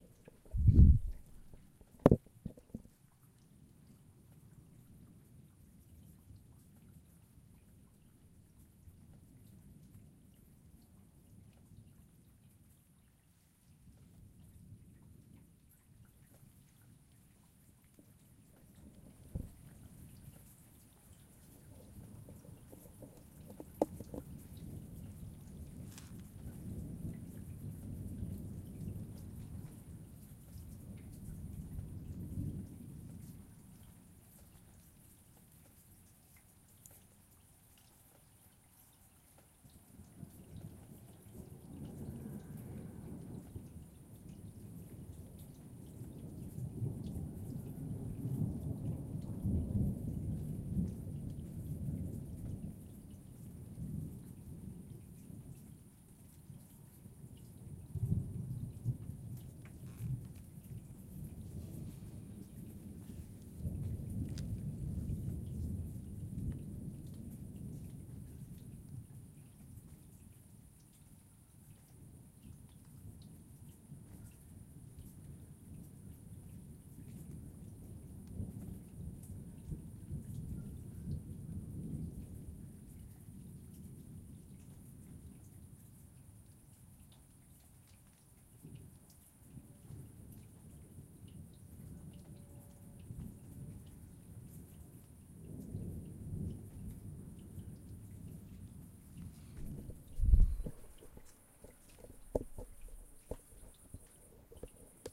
Thunderstorm, light rain
Taken from the Intro of my last music release "Healing Thunder"
thunder, rain, thunderstorm, rainstorm, storm, nature, lightning, field-recording, weather